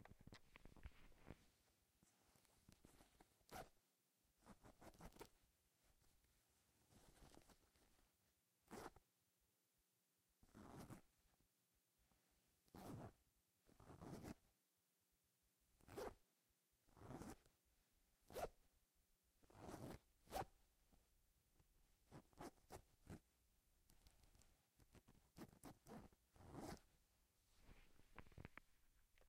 Zipping And Unzipping Pants
Pants being zipped and unzipped.
pants, unzip, zip